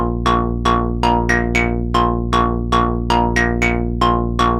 Some recordings using my modular synth (with Mungo W0 in the core)
Analog, W0